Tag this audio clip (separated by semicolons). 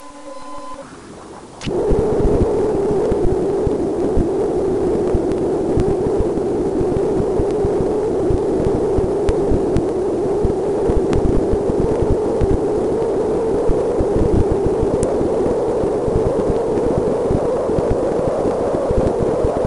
char-rnn; generative; network; neural; recurrent